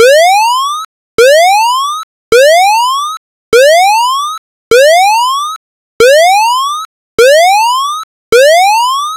8-bit Alarm.
Alert, 8-bit, Alarm